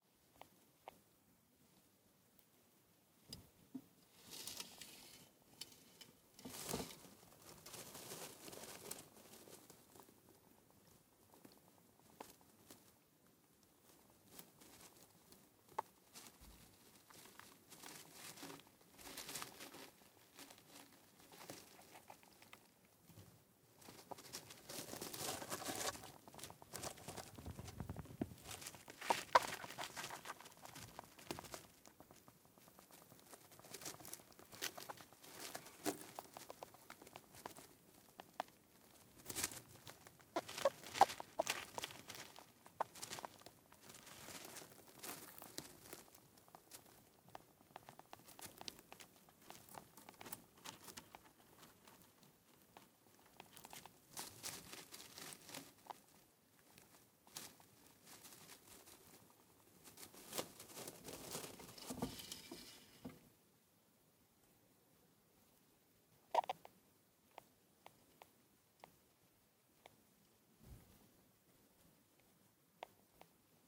hamster eating at night.
MKH60-> TC SK48.
Cricetinae, pet, rodents, hamster, eating, rodent, house-pet